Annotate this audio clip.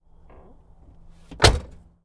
Car Door-Shut Creak PlymouthAcclaim Bulky
Car door creaking and shut
car, plymouth, door, shut, acclaim, bulky, creaking